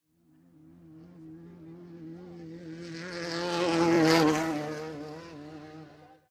motorcycle dirt bike motocross pass by fast doppler